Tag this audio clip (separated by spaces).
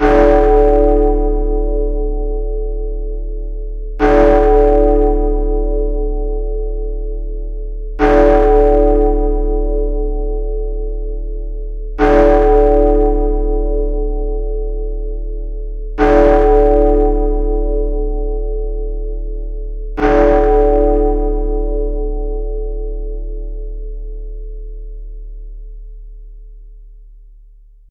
big-ben tollbell six-bell-strikes six-oclock